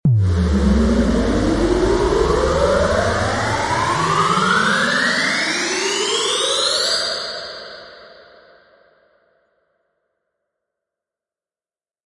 Alien Riser
Made using LMMS.
Some high pitched bass sound that has been automated for no absolute reason whatsoever...
Enjoy
alien, hard